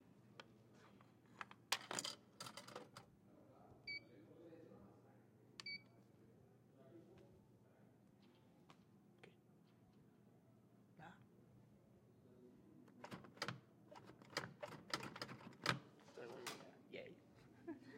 Dispenser Machine at work.